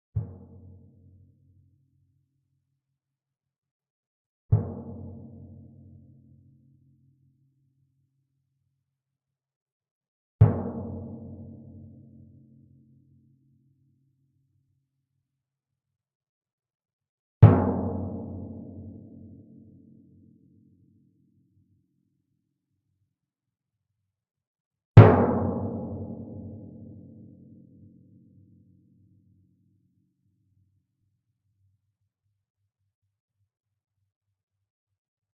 timpano, 71 cm diameter, tuned approximately to F.
played with a yarn mallet, about 1/4 of the distance from the center to the edge of the drum head (nearer the center).